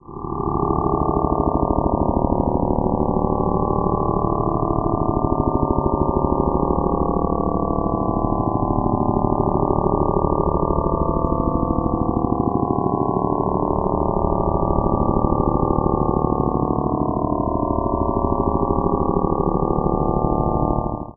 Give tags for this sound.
horror; sci-fi; synthesis

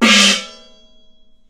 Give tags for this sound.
beijing-opera; china; chinese; chinese-traditional; compmusic; daluo-instrument; gong; icassp2014-dataset; idiophone; peking-opera; percussion; qmul

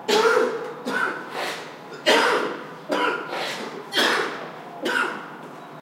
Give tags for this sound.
field-recording; coughing; male

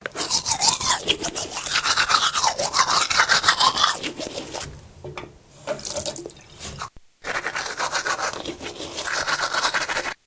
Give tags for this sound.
brush; cleaning; spit; teeth; water

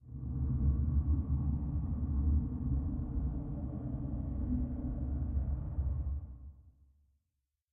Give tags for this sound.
ambience; brig; drone; fiction; room; science; tone

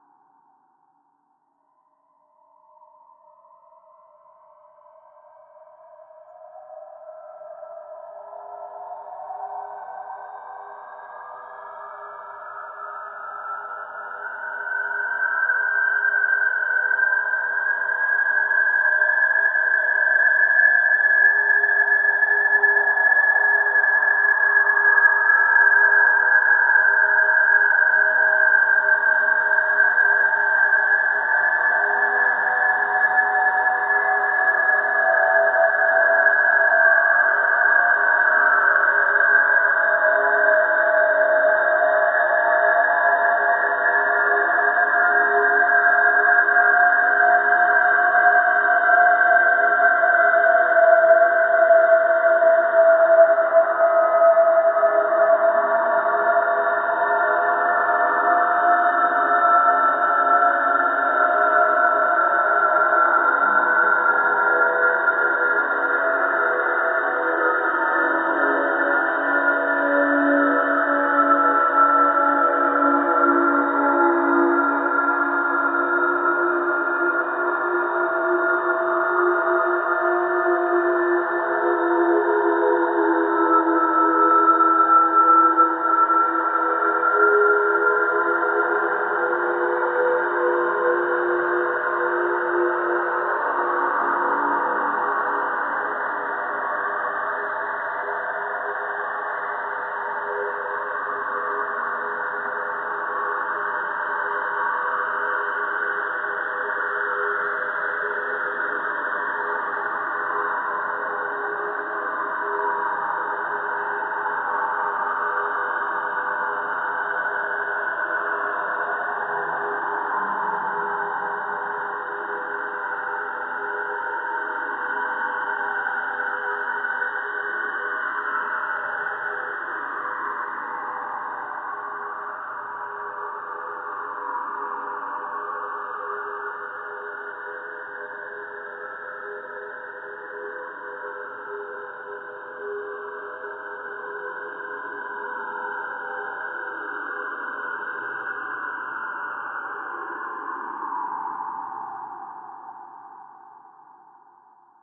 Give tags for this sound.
ambient; artificial; drone; evolving; freaky; horror; multisample; pad; soundscape

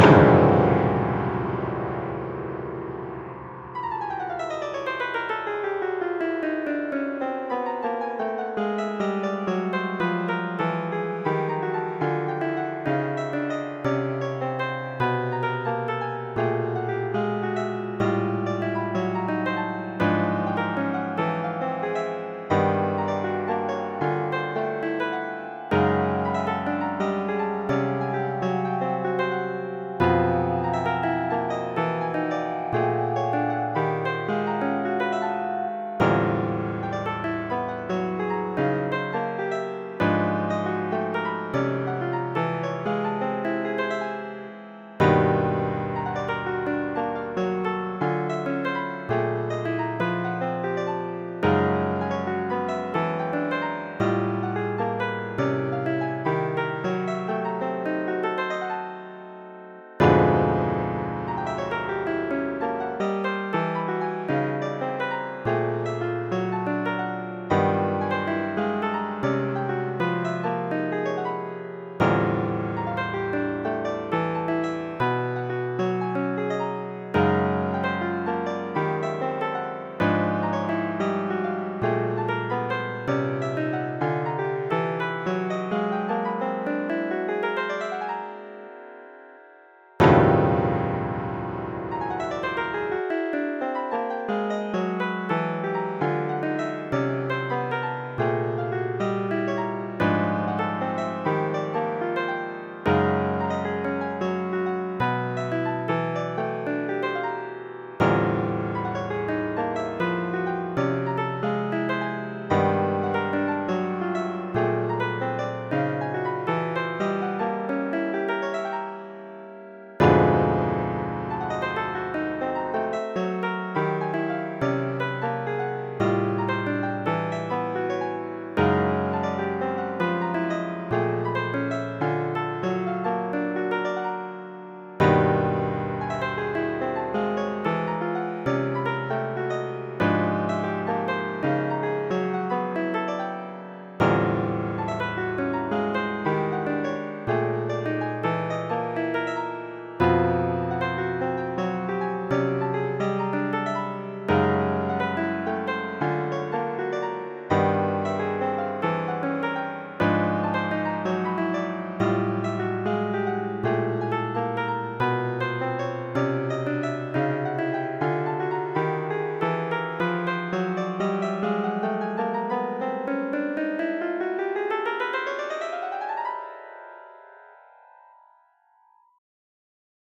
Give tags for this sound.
processmusic; whitneymusicbox; wmb

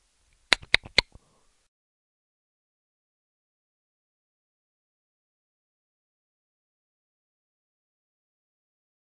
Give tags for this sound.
samples; awful; microphones; clicking; mic; mouth; dynamic

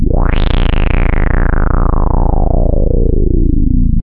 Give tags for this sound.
evil; free; horror; multisample; sample; sampler; sound; subtractive; synthesis

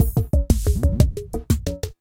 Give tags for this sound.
hip-hop; drums

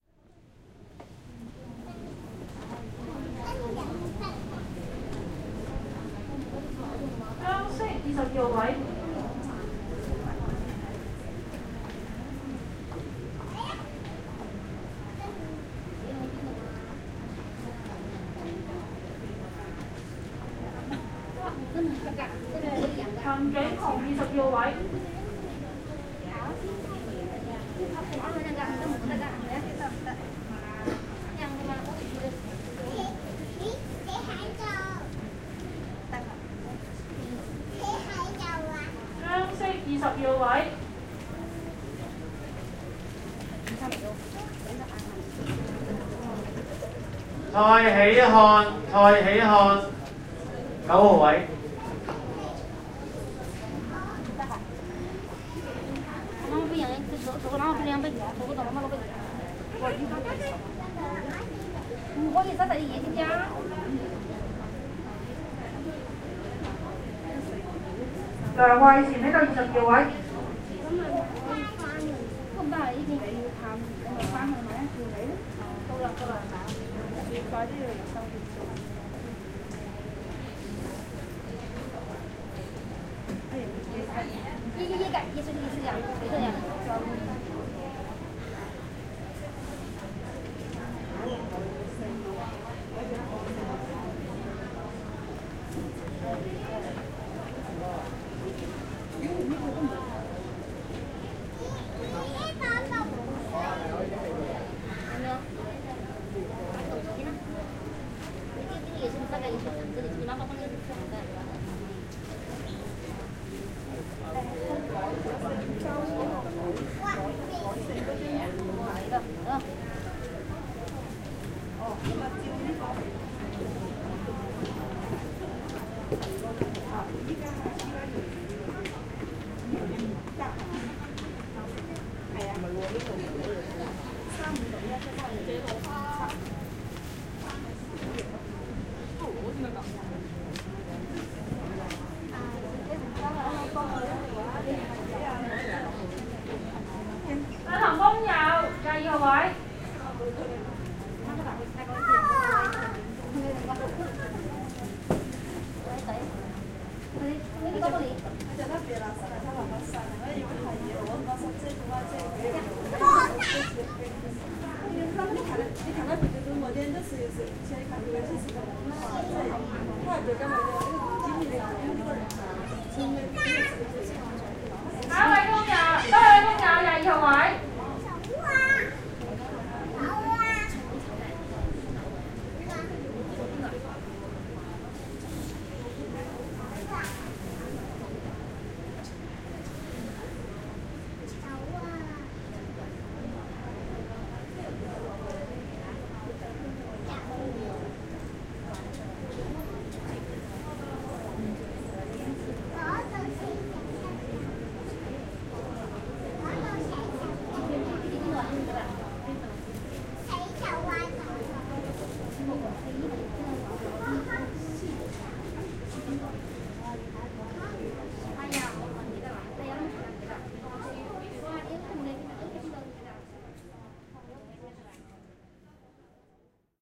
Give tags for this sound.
hospital; hongkong

